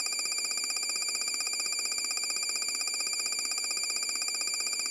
Typing synthesis(Sytrus,11osc,Eq,DvlLoc)
Recreating the effect of screen printing from the movie "Robocop"(1987). Note: the pre-listening mode can introduce significant distortion and loss of high frequencies into the original phonogram, depending on the density of its frequency spectrum.
Sound effects for dubbing screen printing. Use anywhere in videos, films, games. Created in various ways.
The key point in any effect from this series was the arpeggiator. Enjoy it. If it does not bother you,
share links to your work where this sound was used.
appear, beeps, bleep, caption, computer, cursor, data, digital, effect, emerging, film, fx, interface, keystroke, movie, osd, print, print-fx, print-screen, screen, screen-print, scroll, sfx, subtitle, text, title, topic, typing, typing-fx, UI